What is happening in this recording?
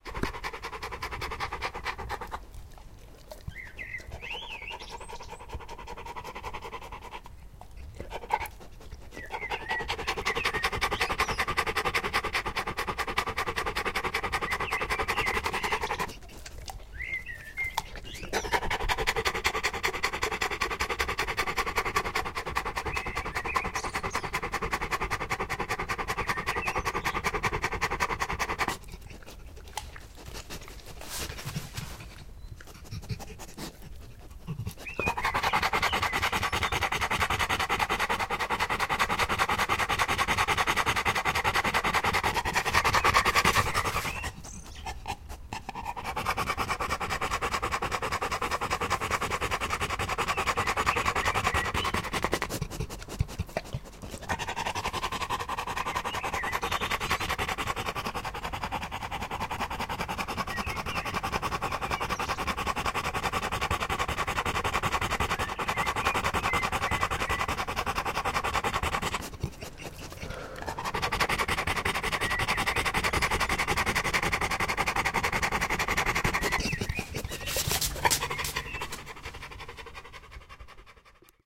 Dog panting 20090425T1417
After a walk with my labrador retriever, Atlas, in the April sun we sat down in my garden. Atlas panted and I recorded the sound... You hear some birds in the garden as well.